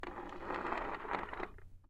I found a busted-up See-N-Say in a thrift shop in LA. The trigger doesn't work, but the arrow spins just fine and makes a weird sound. Here are a bunch of them!
recorded on 28 July 2010 with a Zoom H4. No processing, no EQ, no nothing!